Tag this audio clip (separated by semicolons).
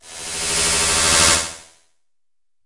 mosquitoes; reaktor; multisample; noise